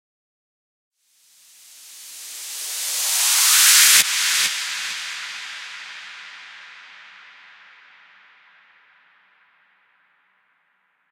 trance noise rise, reverse crush
revers crush delay, without first hit.